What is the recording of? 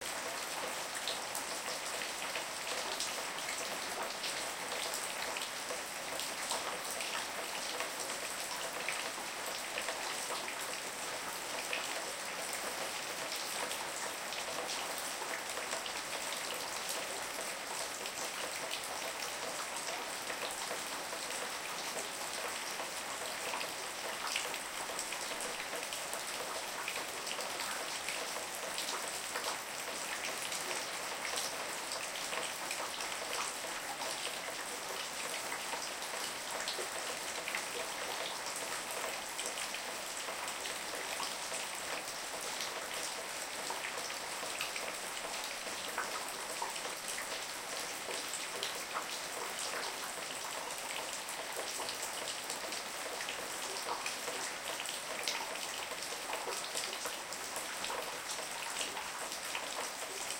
Heavy rain leak falls from the roof into a plastic bucket